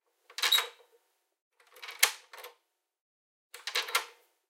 Key Lock
This is a sound recording of me turning key in one of my doors at home.
unlock, door, lock, key